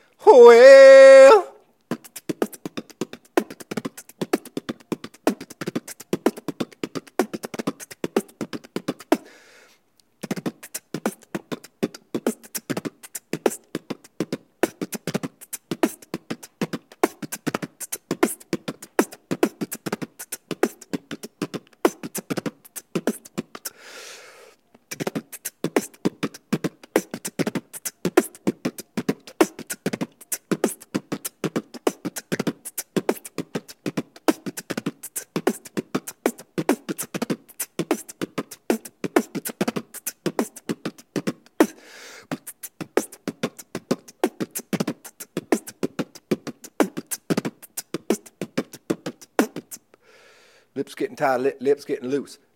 Some fast drum beatboxing beats for looping or whatnot - all done with my vocals, no processing.
Percussion, male, looping, drum, hiphop, chanting, bass, wellllll, beatboxing, well, beat, tribalchant, beatbox, snare, detroit, highhat, loop, tribal